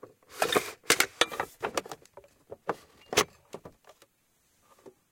Mechanic Sodaclub Pinguin
Mechanik/mechanical Sodaclub Pinguin close recorded
open, Mechanik, nearfield, Pinguin, close, recorded, Sodaclub, mechanical